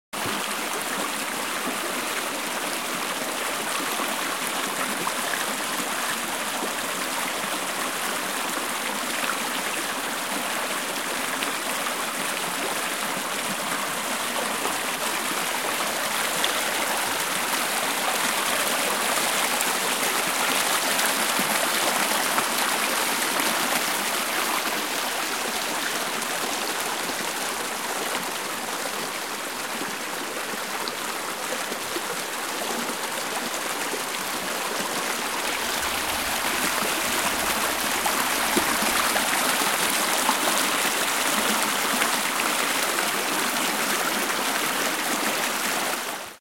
Water Stream Kremikovtsy
field-recording, stream, water